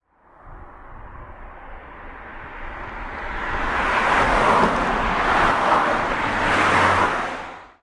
Location, st Pol De mar, near the beach, monsters run not so far...
ambient, car, delhi, india, minidisc, recorded, sound